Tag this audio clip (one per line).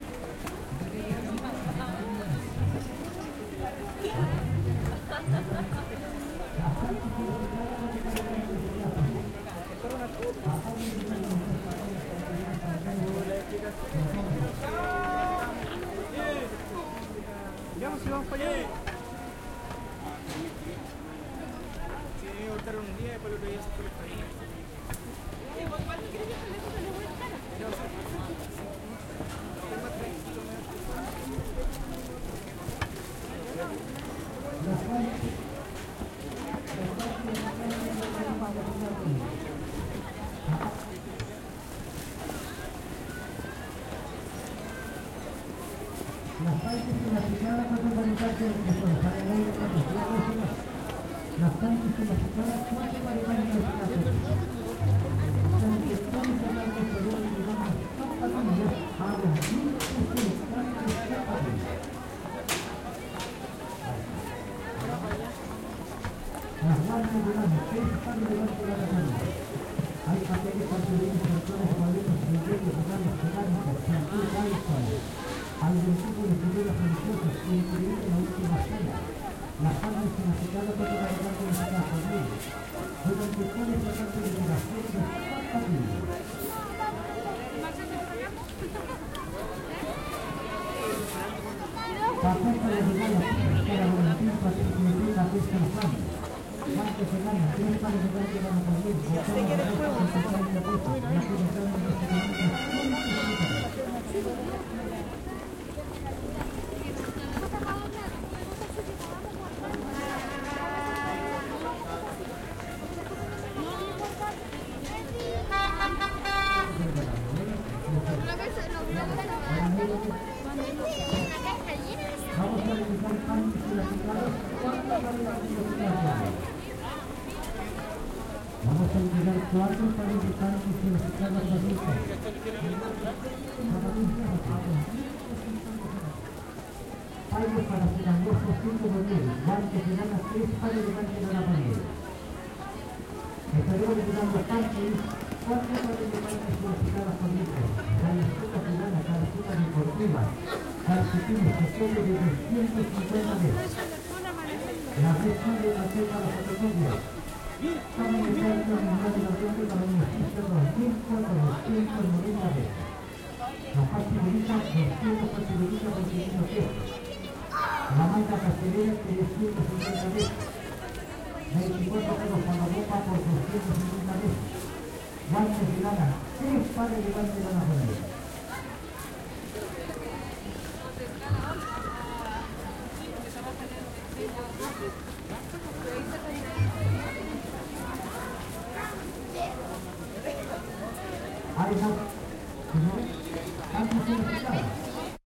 alfonso
chile
commerce
meiggs
paseo
retail
salvador
san
sanfuentes
santiago
street
trade